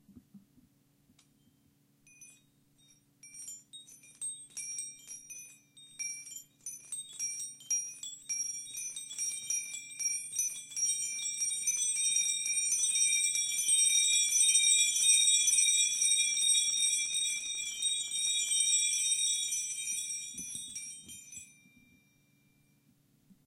Italian antique doorbell ringing
Antique doorbell, 8 bells rotating, in Taormina, ringing.